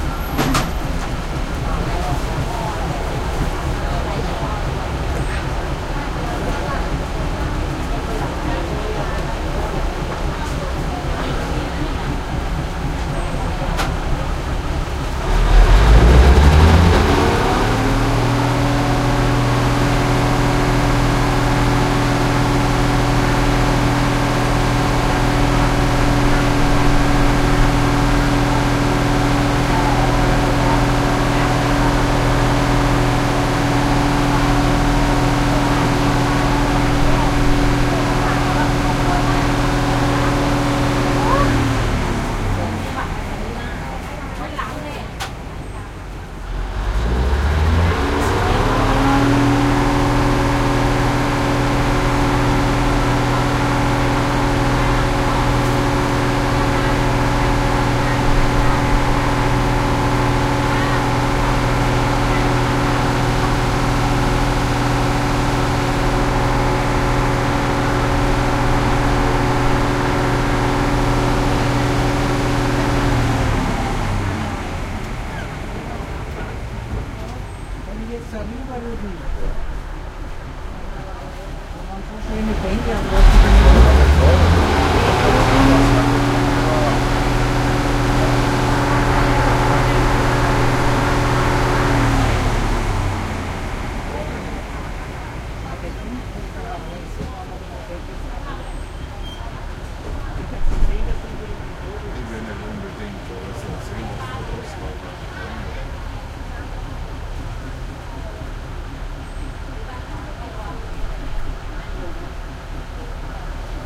Thailand passenger commuter train open air on board idling in Wongwian Yai train station +long diesel engine revs